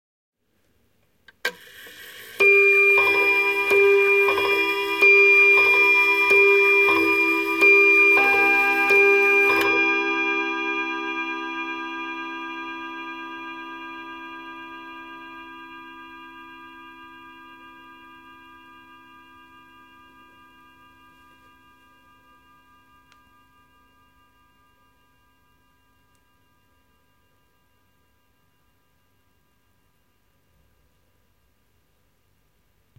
Antique table clock (probably early 20th century) chiming six times.